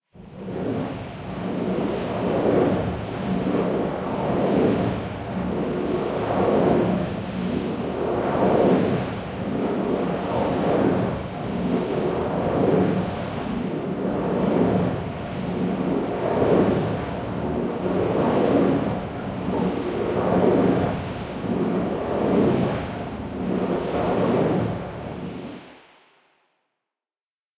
Air portal sound sound fx, the effect was recorded playing with a cardboard and a brush,the sounds were mixed and some effects were added in adobe audition
space, fiction, science, portal, stargate, sound, vortex, scifi, fantasy, air, epic, sci-fi, energy